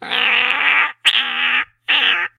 weird bird
making weird sounds while waiting for something to load